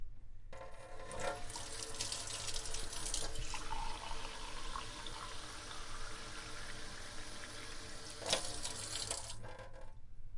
Pouring a glass of water
Does this really need a description? Yes. Yes it does. It's water being poured out of a kitchen fauced into a glass that's made of glass (I swear).